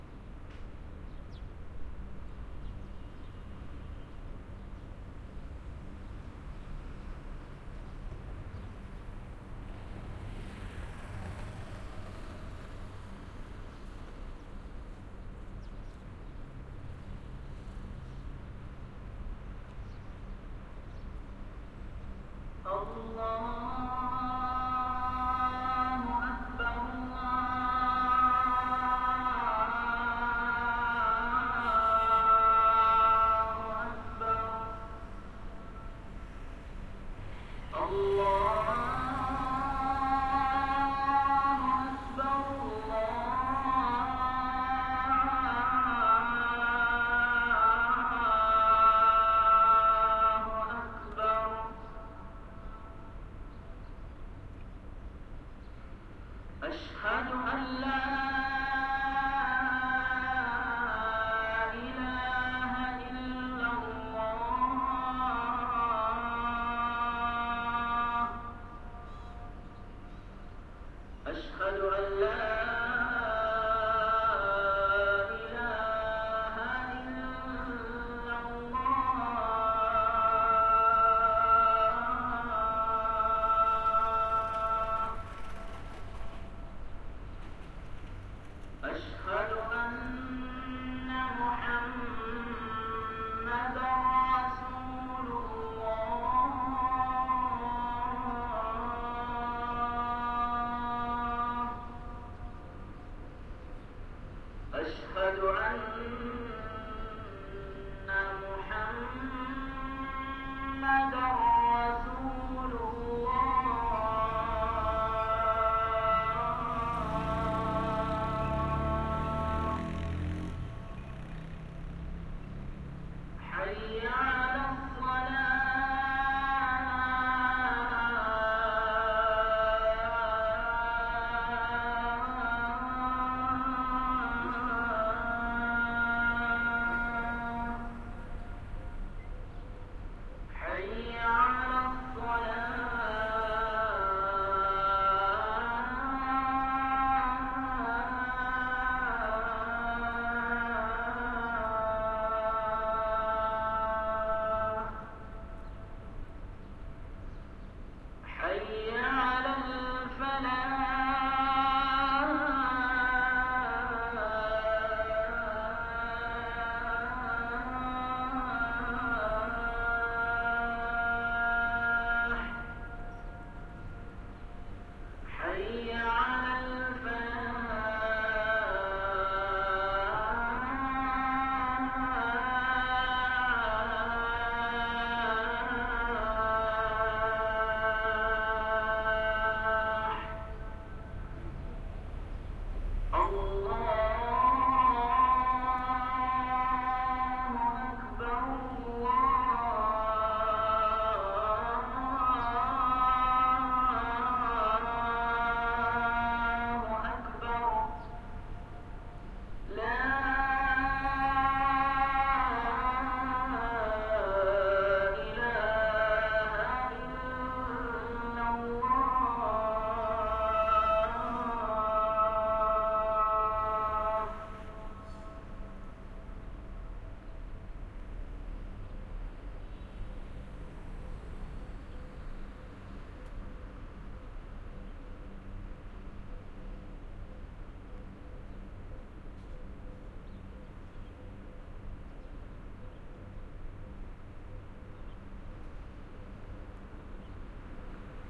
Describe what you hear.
AbuDhabi 12 muezzin
I recorded this muezzin during my stay in Abu Dhabi. It was the mosque close to the hotel I stayed in. It is the noon-time-prayer. Abu Dhabi has a lot of hihgrisers. this adds nice natural echos to the sound.